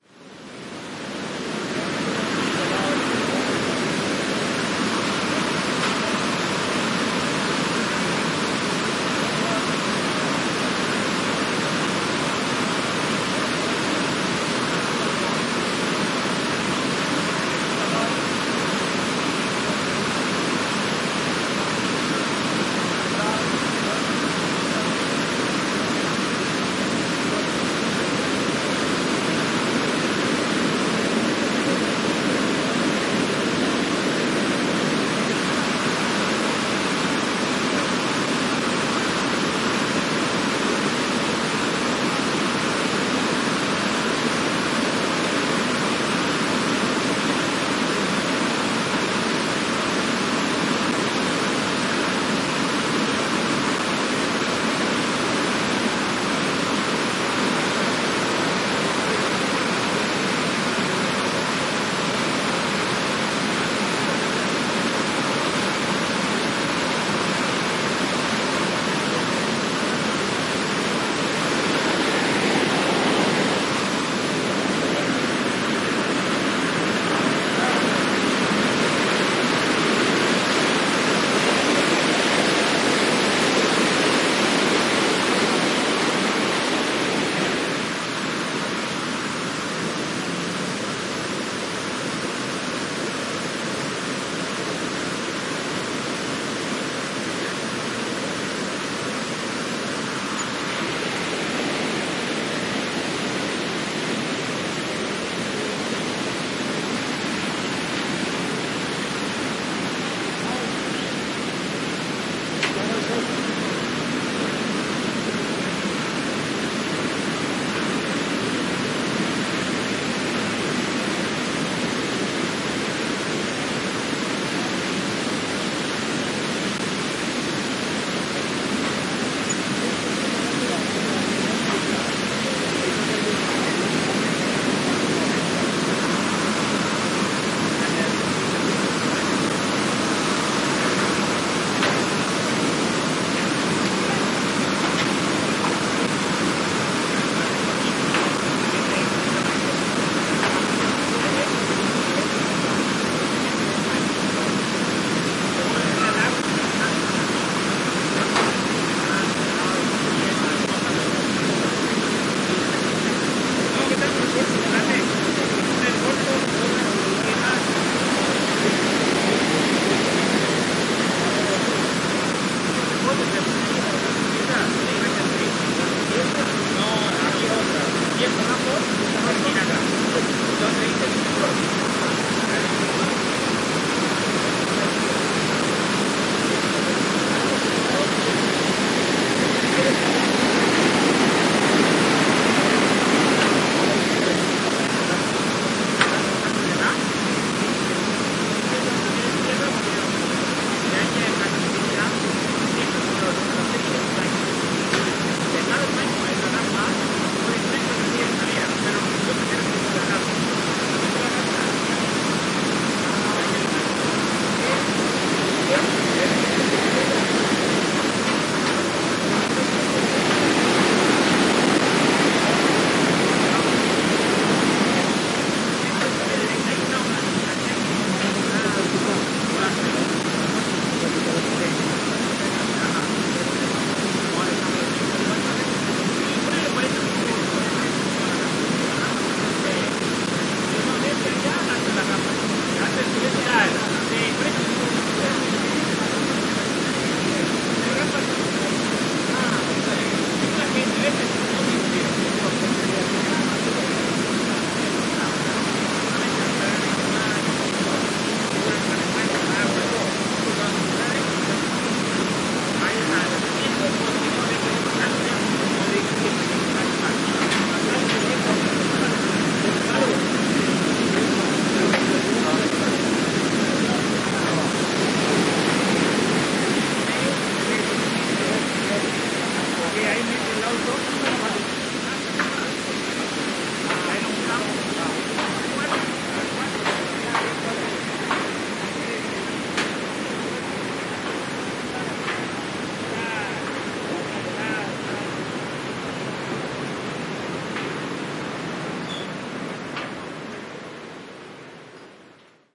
Noise of one of the huge Iguazú waterfalls, in Iguazú National Park (Misiones, Argentina). Some talk and noise of steps on the metal walkway can also be heard. Soundman OKM capsules into FEL Microphone Amplifier BMA2, PCM-M10 recorder